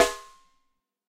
Ludwig Snare Drum Rim Shot